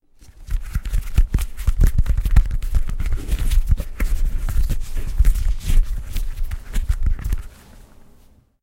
Rubbing an apple with hand and fingers. The wax skin makes it a little sticky. The sound is abstract and undefined. It is perfect as a source for further processing and sculpting.
apple, finger, rub, rubbing, sfx, skin